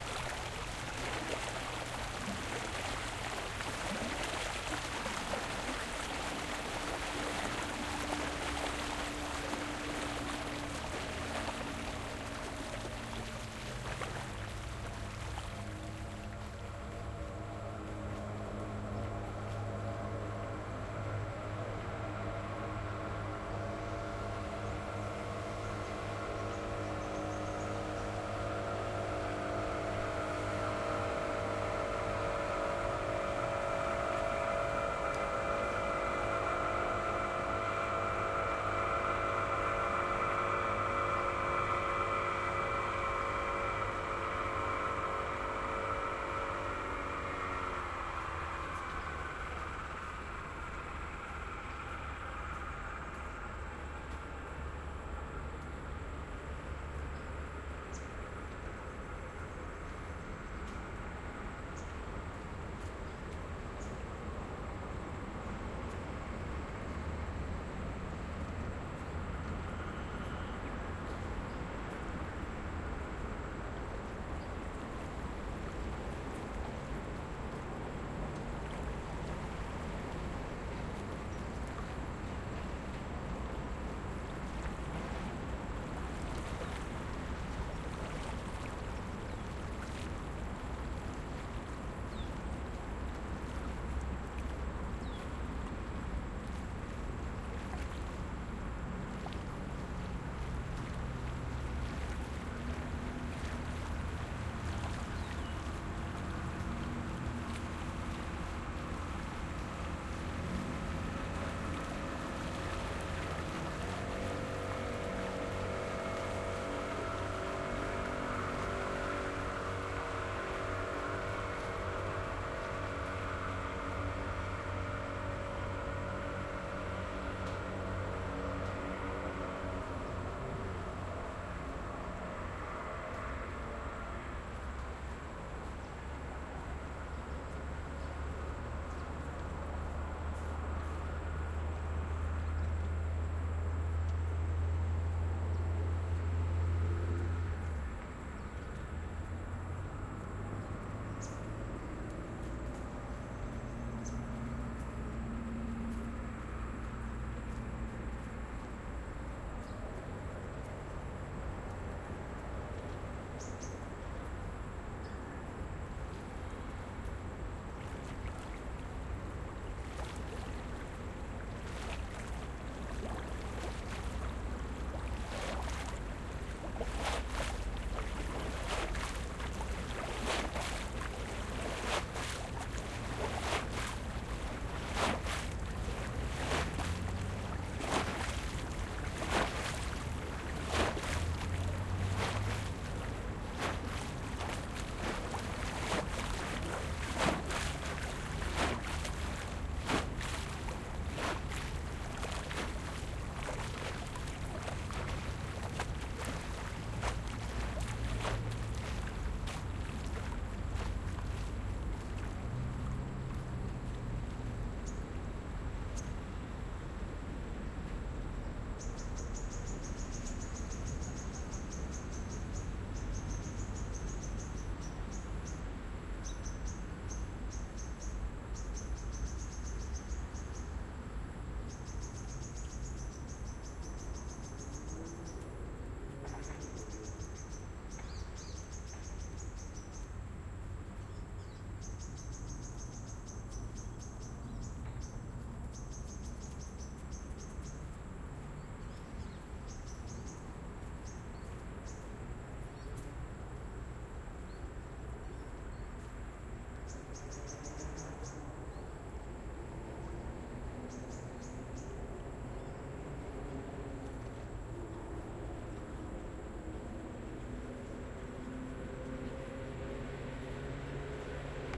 Ambience by the River
Recorded using Zoom H6 XY configured microphones with 120-degree wideness on both mics with a camera stand holding it and it was slightly lowered down to record the water clearer.
It was on Monday evening, less wind and not too many people exercising in the park. The park is near to a road, and the river of Brunei. This recording aims to record the water boat, the water splashes on the stairs which were created by the waves and the overall sound that could hear in the park like running, walking, chatting, traffic and etc.
ambient, chatting, engine, field-recording, jogging, park, river, running, splash, talking, traffic, walking, water, waterboat, waves